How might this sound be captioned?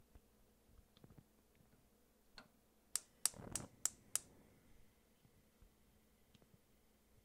Lighting the burner on a stove